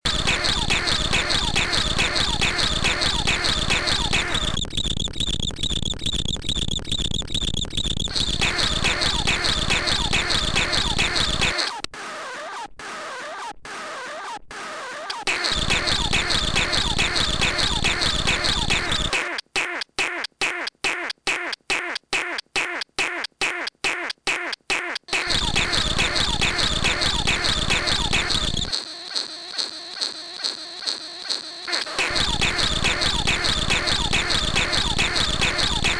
001 - DUCK MACHINE
A mix of loops, forming a living machine pattern that can be used together or separated look liking a duck, a toy, or a vintage machine, a scratch, and what more your imagination creates.
Made in a samsung cell phone, using looper app, and my own noises.
sfx, electronic, machine, sound, strange, glitch, effect, engine, crazy, looper, insane, abstract, lo-fi, loop